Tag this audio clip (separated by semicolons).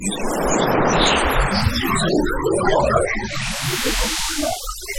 abstract; audiopaint; effect; electronic; image; image-to-sound; weird